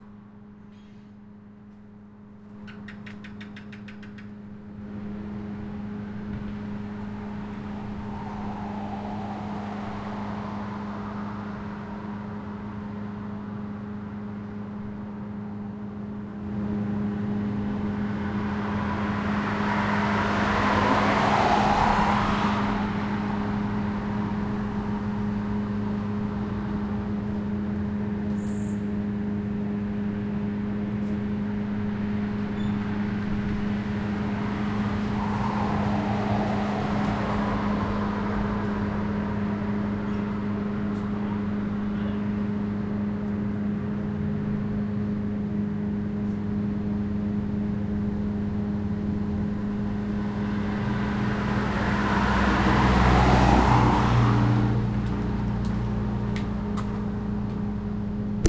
Outdoor ambience
The side of a street with a few passing cars and the buzzing of a vending machine
ambient, traffic, general-noise, ambience, roomtone